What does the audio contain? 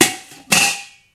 Metal hit and clatter